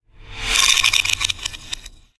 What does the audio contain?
swoosh/shutter
a kazoo dragged across a heater but reversed and sped up to sound like a swooshing shutter noise
crack, shutter, swoosh